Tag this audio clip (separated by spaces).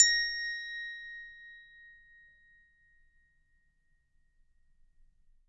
bell Christmas percussion